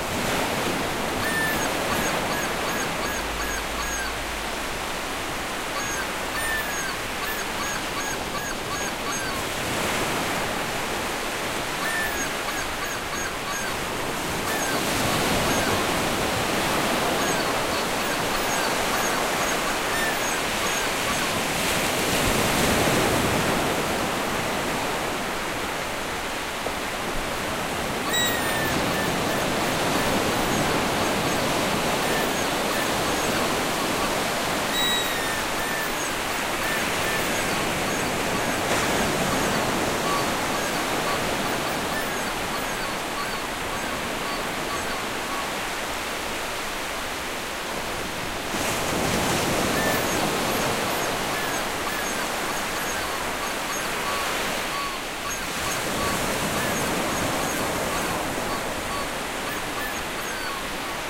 Ocean Waves Loop - Day
Recorded with Zoom H5 at night in Maine, USA. Same as my "Ocean Waves Loop - Night" sound but overdubbed squeaky toy sounds to imitate seagulls in the day.
ocean, field-recording, day, waves, sea, beach